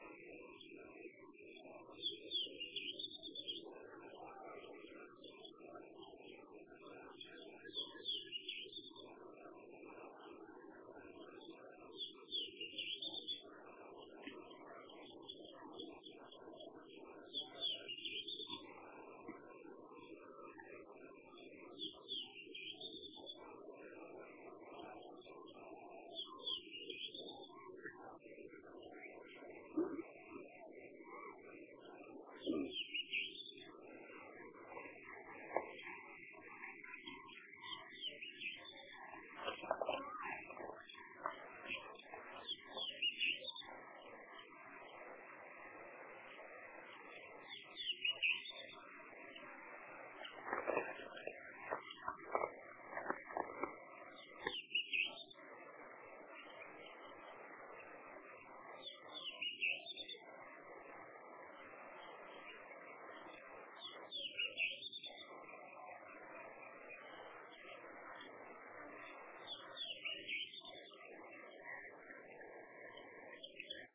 Calls of a bird calling at around 5 pm in the reedbeds on the shore of Kamalapur lake (Hampi). March 31, 2011
birds, hampi, india